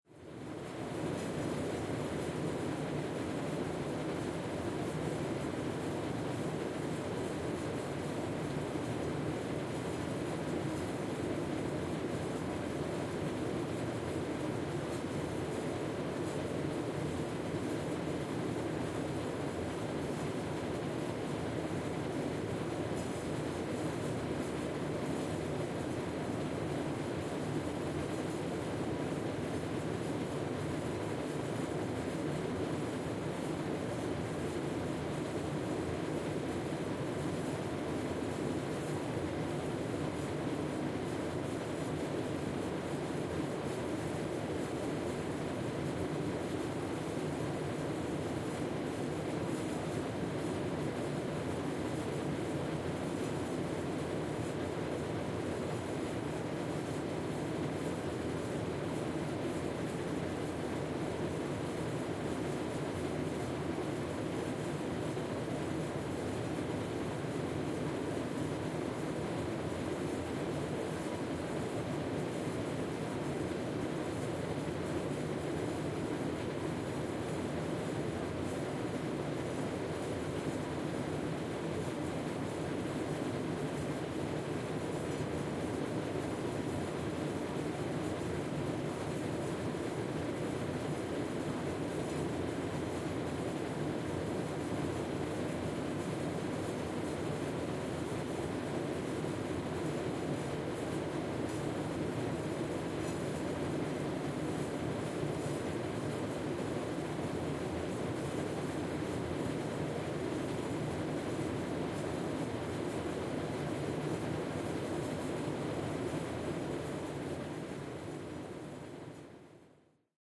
Room Tone Office Building Bathroom Air Conditioner Run
street, nature, ambiant, effects, europe, vehicles, urban, animals, effect, tone, ambient, ambiance, animal, carnival, water, fx, carnivals, sound, air, ambience, atmosphere, vehicle, outdoors, sfx, background